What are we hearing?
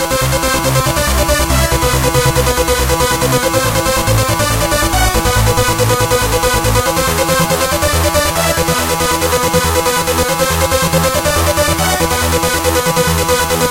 The third part in phobos. i added a saw melody and a bassline.